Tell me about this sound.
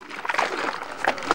Water bottle 3
3, bottle